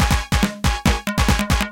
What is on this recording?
Tech Trash2 140
Some cool glitchy noise stuff I been toying with